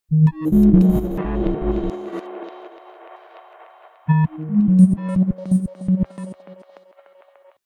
A bass patch I made sequenced with some glitchy and echo effects. It should loop pretty well.
echo, glitch, loop, pattern, sequence, sound-design